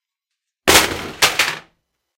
Sound of falling a ventilation crate, made by throwing down computer case cap :D Recorded on Blue Yeti.